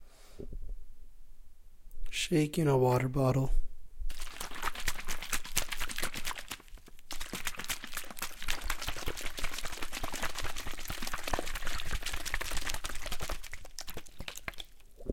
Shaking water bottle
Sharing a plastic water bottle. Recorded with a Condenser mic.
shake, liquid, bottle, water